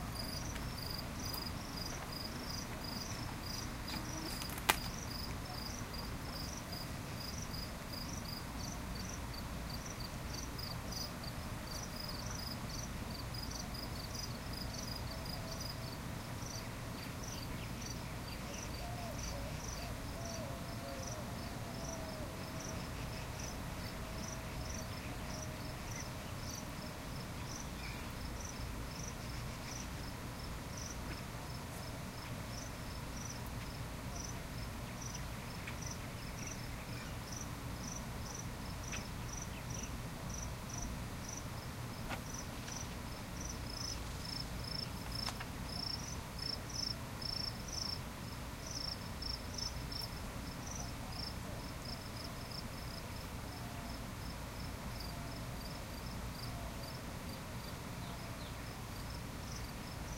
A few crickets chirping at the Arizona Sonora Desert Museum. This was recorded a little before 9 AM using an Edirol R-09HR.